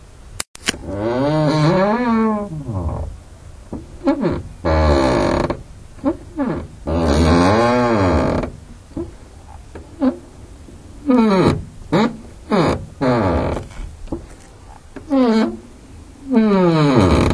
durys geros42
wooden door squeaks